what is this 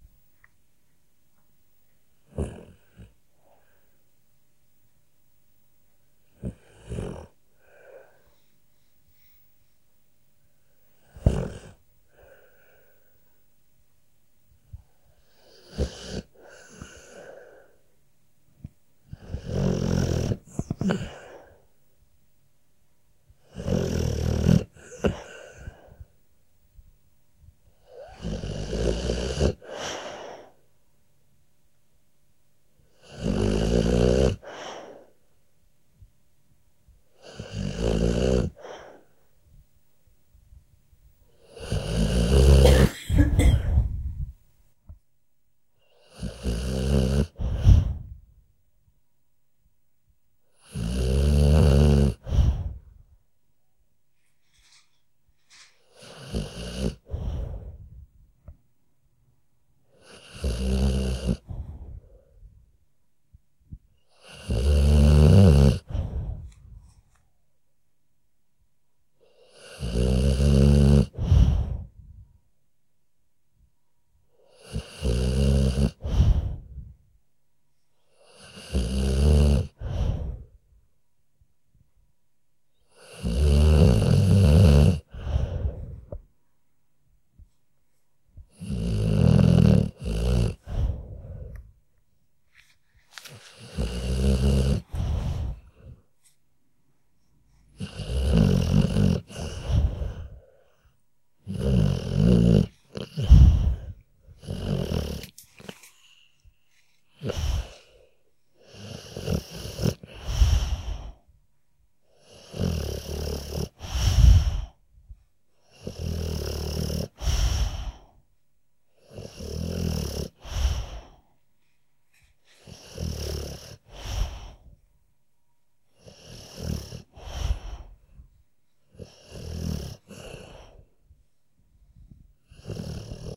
rocco russa
my dog snoring
snore, dog